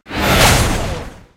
Effects recorded from the field of the ZOOM H6 recorder,and microphone Oktava MK-012-01,and then processed.
game, Sci-fi, futuristic, woosh, morph, abstract, noise, hit, dark